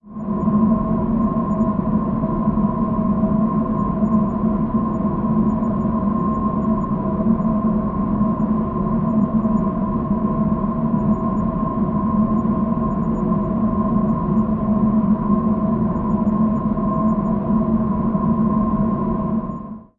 HzCope-EarthAtmosphere
Audible representation of the chemical composition of the Earth's atmosphere.
Representation, SuperCollider